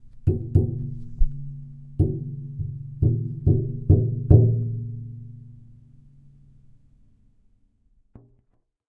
Tapping on metallic object. Recorded in stereo with Zoom H4 and Rode NT4.